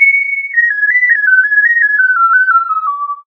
Flute-like joyful riff played on vintage analogue synthesizer Roland JUNO106. No processing.
you can support me by sending me some money: